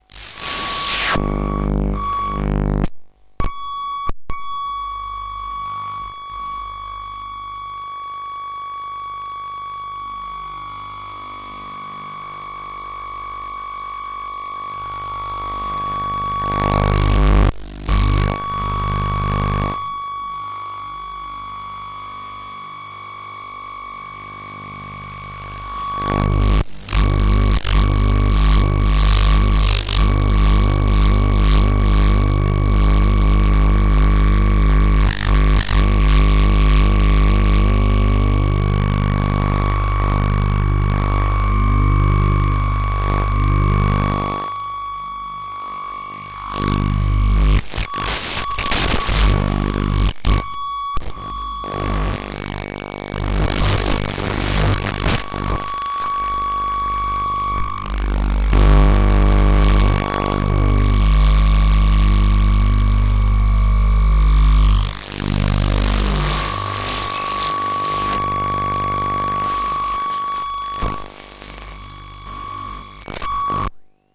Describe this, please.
EM scan of a G4 powerbook. modulating noises and overtones. With sub audio to high frequency soundlayers. the sound changes drasticlly as the coil is being moved over the surface of the machine.
equipment: EM scanner, coil, Zoom H4
recorded in Dortmund at the workshop "demons in the aether" about using electromagnetic phenomena in art. 9. - 11. may 2008
em, noise, computer, powerbook